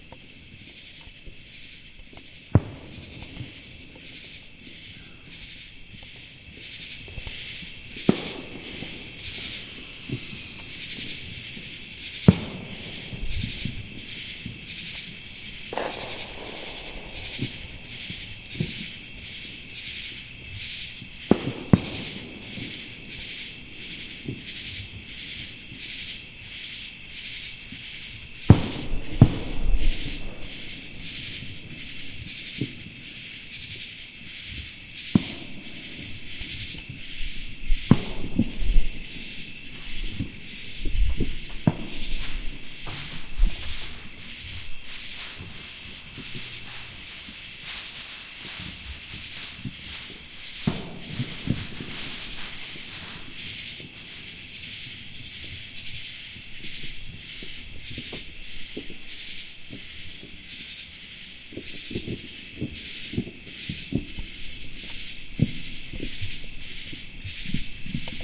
Distant fireworks in the South
Recording on July 4th of distant fireworks in Georgia. The sounds of summer (Katydids, Cicadas) mix with the sound of explosions.
america, cicadas, explosions, fireworks, georgia, july, katydids, south, zoom